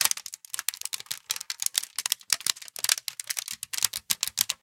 delphis PLASTIC CRUNCH LOOP 06 #104
Selfmade records @ home and edit with WaveLab6